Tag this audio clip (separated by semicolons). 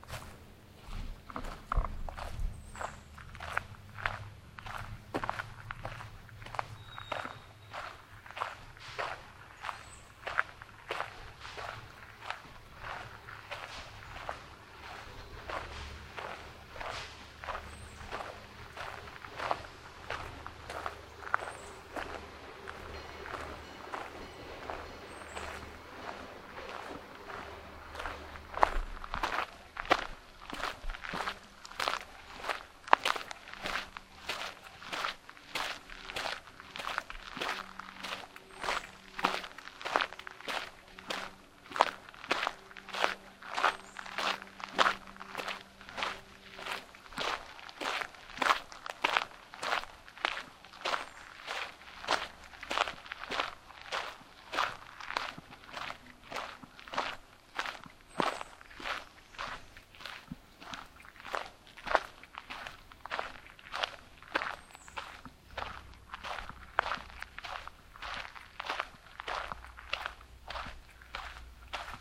ambiance; field-recording; nature; walking; woods